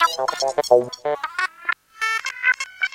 part of pack of 27 (funny) sounds, shorter than 3 seconds.